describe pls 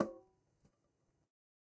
Metal Timbale closed 012
record, closed, trash, real, home, god, conga